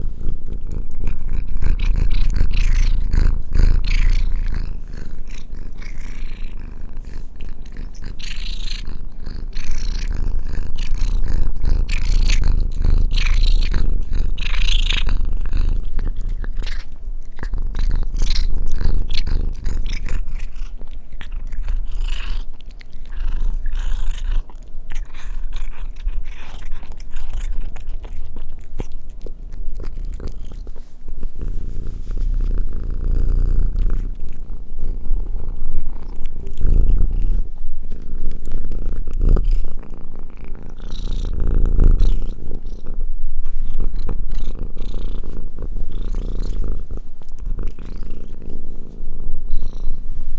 I recorded my cat Tails eating a treat. She was purring and making weird noises as she ate. Have fun! It's cool to speed it up / slow it down :D
cat, eating, field-recording, purring, sound, weird